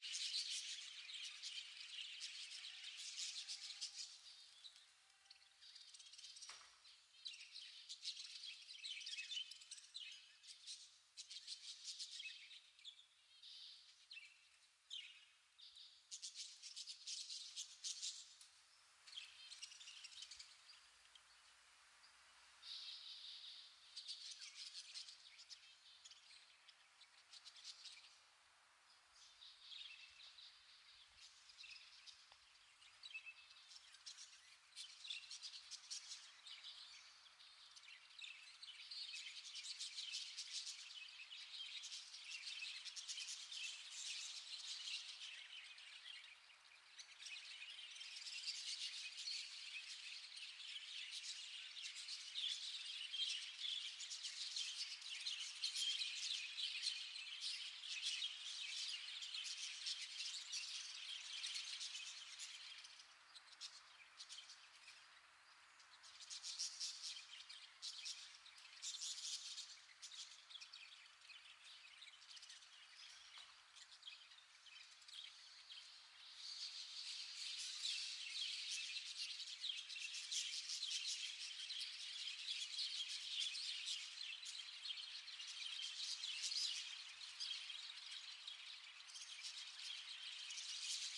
Birds-in-a-cage 1
A huge cage on an appartment balcony with a lot of birds. Recorded with Rode NTG-2 mic, Sony PCM-M10 recorder.
bird, birds, soundscape